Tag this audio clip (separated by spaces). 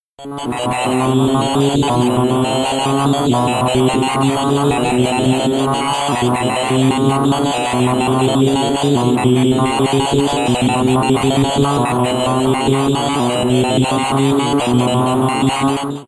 synthesizer waldorf computer